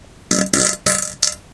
fart poot gas flatulence flatulation explosion noise weird beat aliens snore laser space